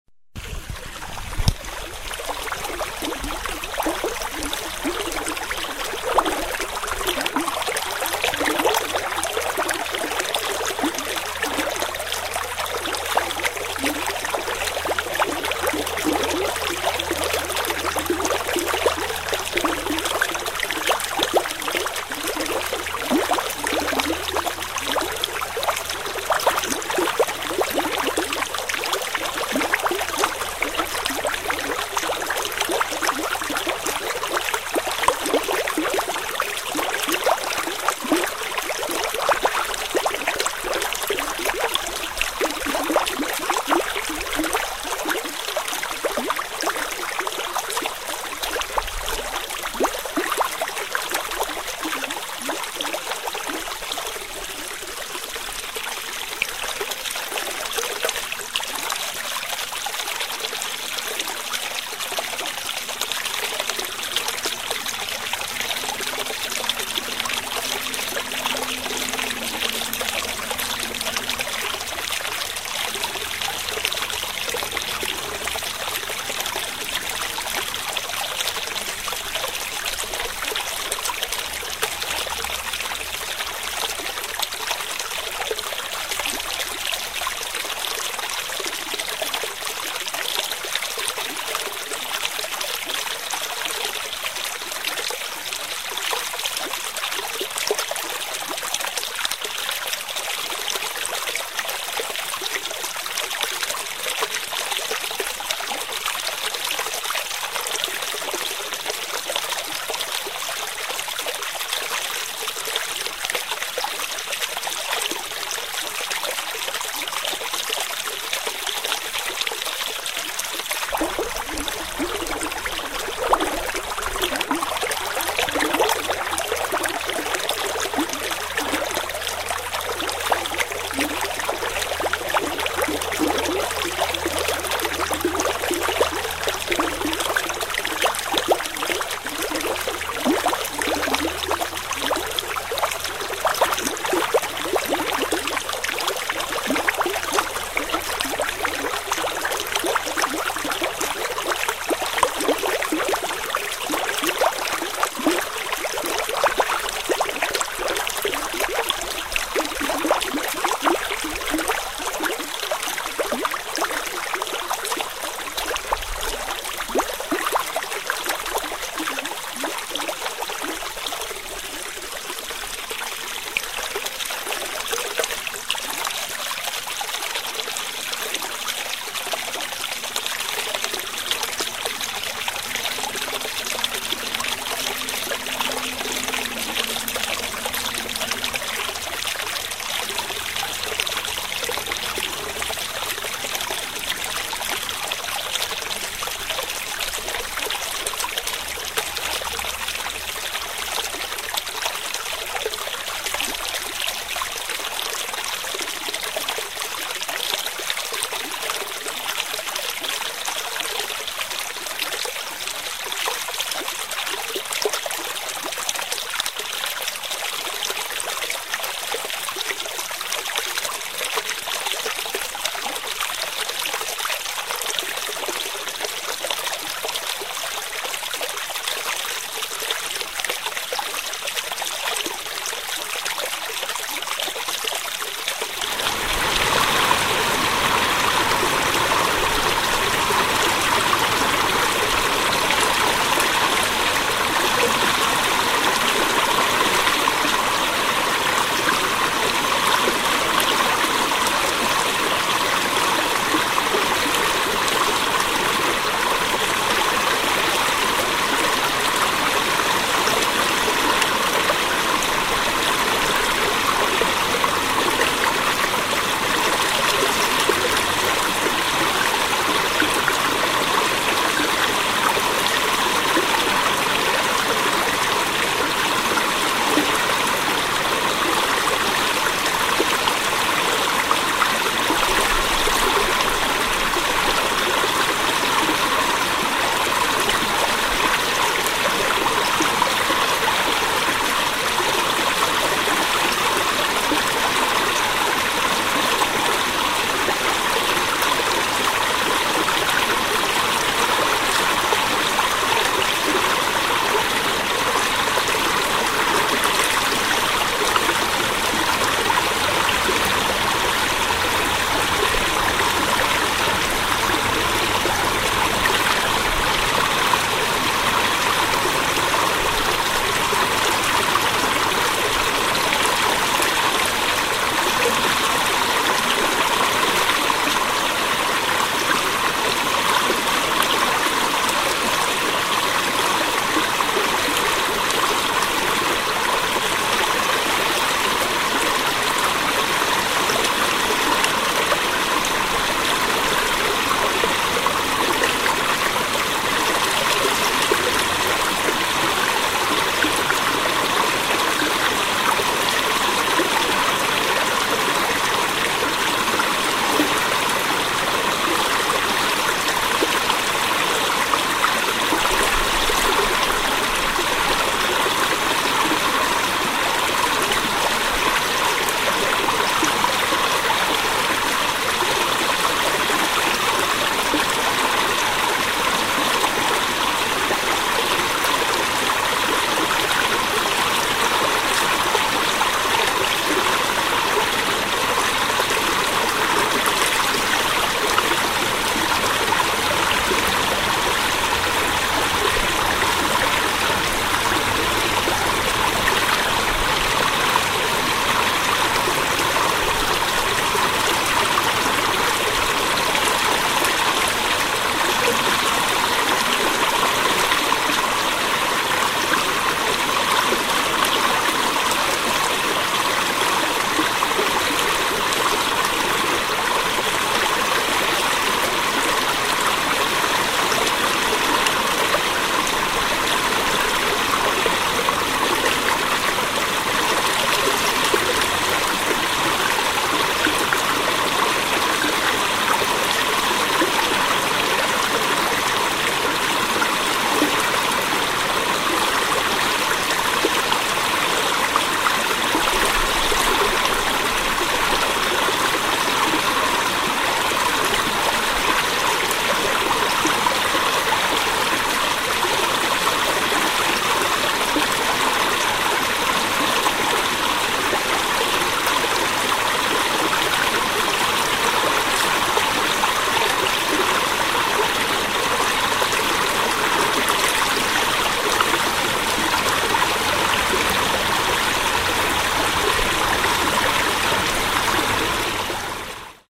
This recording was made along the Ocoee River in Tennessee. It is a combination of 3 or 4 recordings from different locations along the river put into one file.
running-water, tennessee